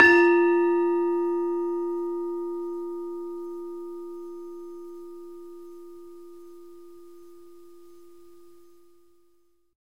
Sample of a demung key from an iron gamelan. Basic mic, some compression, should really have shortened the tail a bit. The note is pelog 3, approximately an 'F'
demung, gamelan